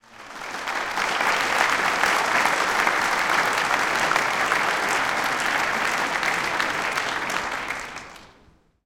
Applaus - Seminar, kurz
Short applause after a seminar